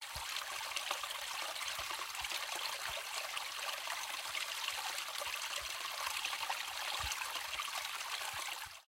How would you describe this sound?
Small Water Spring
creek, nature, spring, water